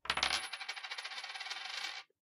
coin or money spinning on a wooden or plastic table